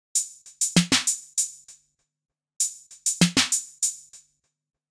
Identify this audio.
Vintage drum machine patterns